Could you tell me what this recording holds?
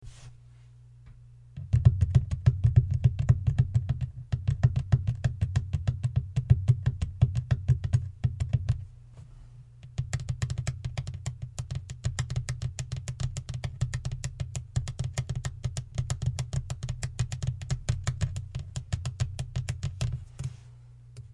nails tapping of hard surface
Tapped both hand on the table to create two different noises from the beginning to the end
nails, tapping, table, hard-surface